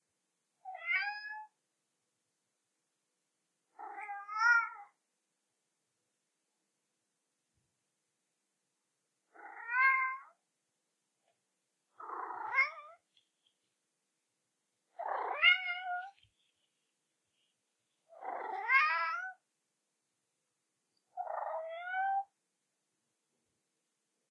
049-Oscar-Miauw
In Holland cats are considered to say 'Miauw'
Oscar, the blind cat, has a weird accent though. Especially when he is hungry...!
Recorded with a Olympus LS-10
meow, animal, miauw, Oscar, domestic, cat, animals